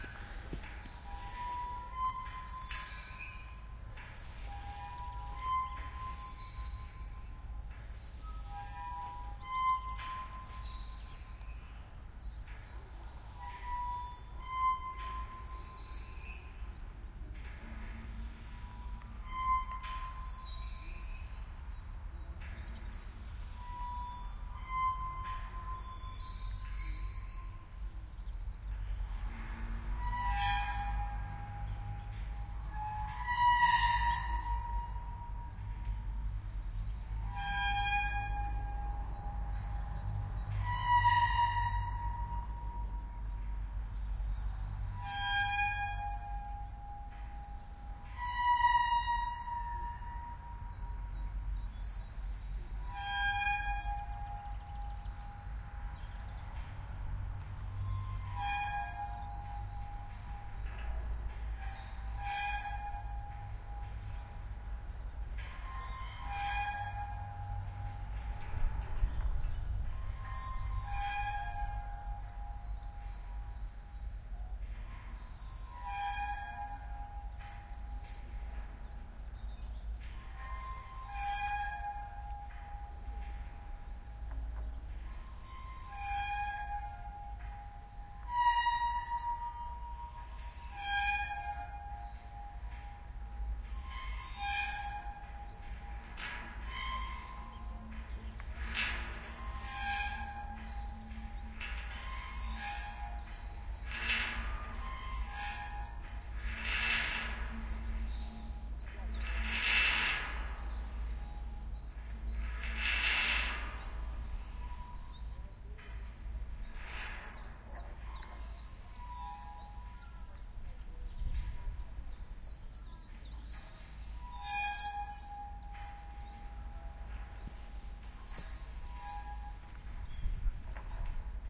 A recording of a metal windmill. You can hear the resonant sounds of a metal pole slowly going up and down, lightly rubbing on a metallic disc, changing in tempo, pitch and volume with the slight breeze that was powering the windmill.
I attached stereo microphones to the metallic structure for this recording. It is unprocessed. Recorded with a Zoom H2N